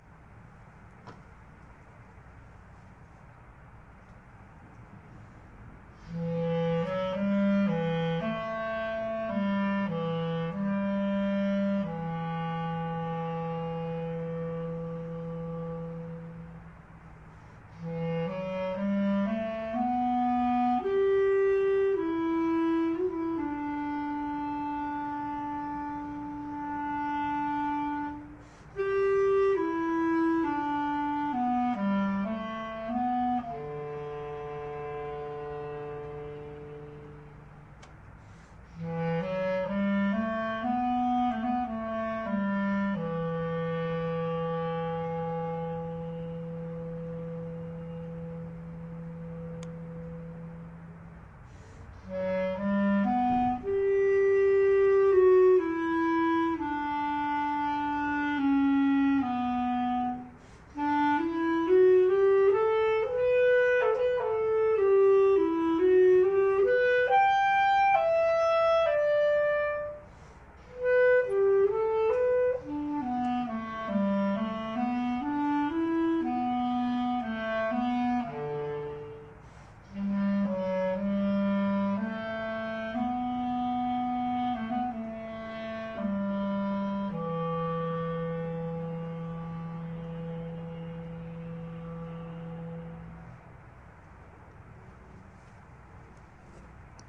Ralph Vaughn Williams' Six Studies in English Folk Song III practice performance session
studies, practice, williams